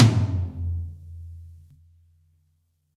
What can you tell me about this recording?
Middle Tom Of God Wet 017
pack, tom, drum, realistic, drumset, set, kit, middle